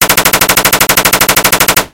Assualt Rifle Shooting7
I created this sound with a small sample made by "pgi's" which I reused it multiple times right after another and changed the speed to create this amazing sound.
Machine-Gun, Combat, Light-Machine-Gun, Shooting, Firearm, Sub-Machine-Gun, Shots, War, Fire-Fight, pgi, Modern-Warfare, Video-Game, Gun, Action, Call-Of-Duty, Assault-Rifle, Game, Gunshots, Rifle, Battle, Battle-Field, Weapon, Realistic